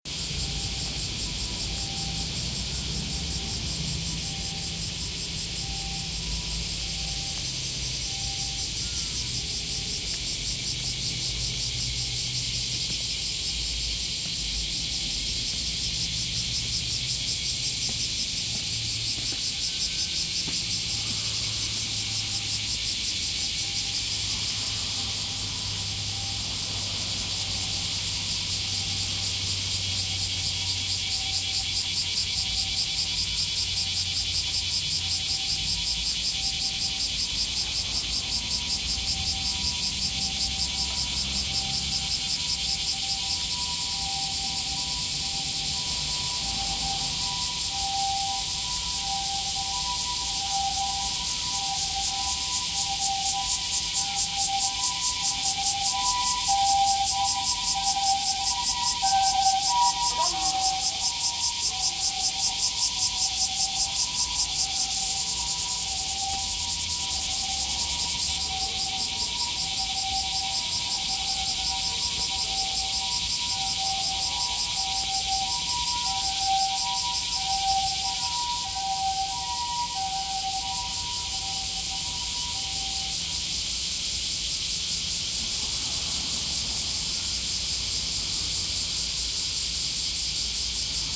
Cicadas and ambulance sound at the parking of Kusatsu Total Clinic in the morning. Recorded at Shiga, Japan 2020.08.04 08:20:50.
Field-recording, Cicadas, Ambulance